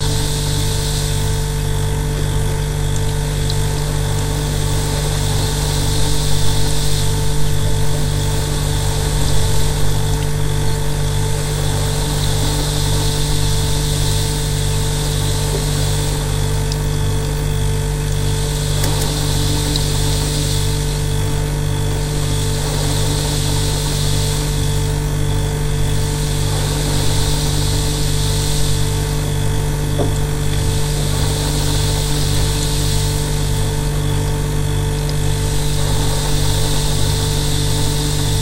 A fridge.
Recorded by Sony Xperia C5305.
noise, fridge, house-recording, drone, appliance, ambience, refrigerator